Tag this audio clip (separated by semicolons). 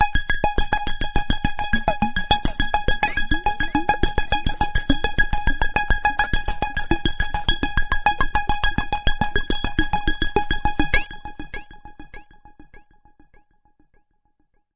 130bpm; arpeggio; electronic; loop; multi-sample; synth; waldorf